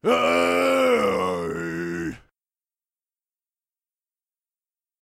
Toni-PitchedScream2
Pitched Scream recorded by Toni
pitched, scream, voice